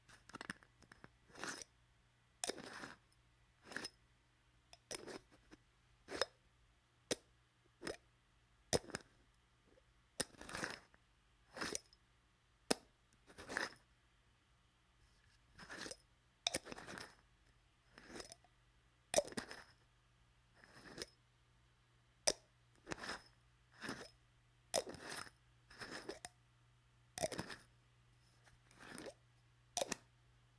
Screwing and unscrewing a tin cap to a small spice jar.